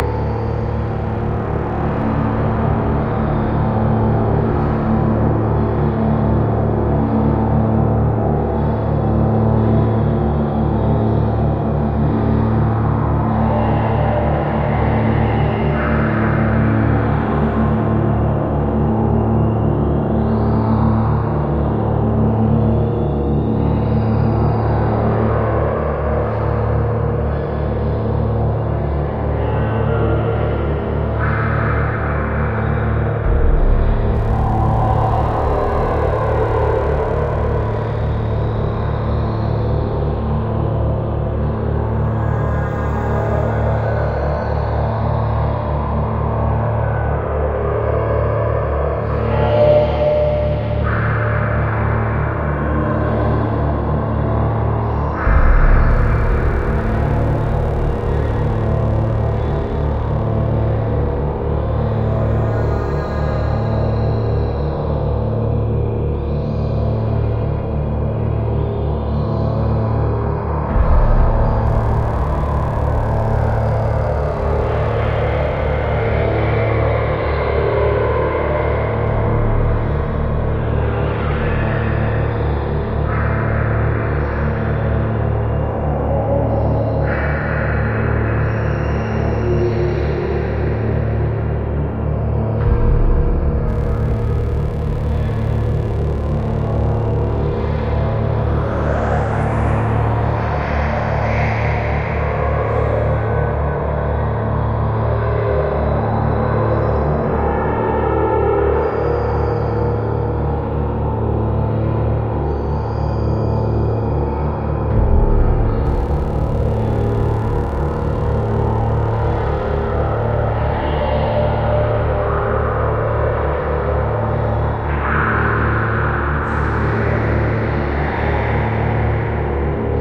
Robot Torture (Loopable Soundscape)
creepy, hell, phantom, scary, sinister